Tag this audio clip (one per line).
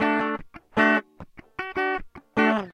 solo
chill
guitar